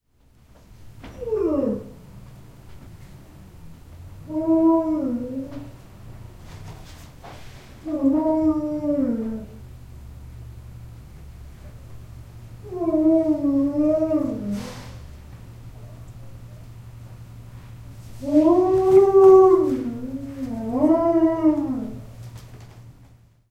Boris Moan 1
Our Alaskan Malamute puppy, Boris, recorded inside with a Zoom H2. He is apt to moan in the morning when my wife leaves.
bark, dog, growl, howl, husky, malamute, moan, Wolf